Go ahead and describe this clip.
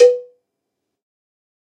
MEDIUM COWBELL OF GOD 039
kit, pack